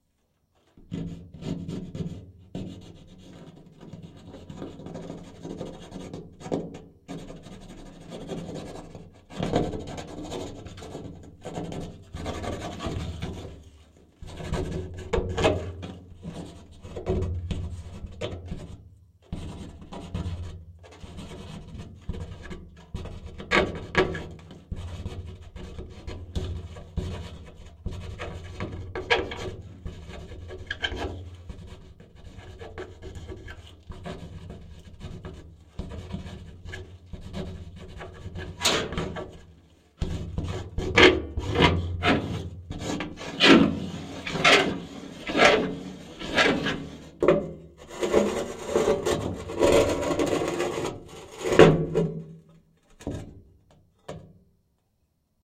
scraping plastic cup on metal04
Contact mic on a large metal storage box. Rubbing a plastic cup upside down on the surface.
metal, metallic, grinding, scrape, friction, contact-mic, sawing, scratching, piezo, scratch, filing, grind, rubbed, scraping, rubbing, rub, rough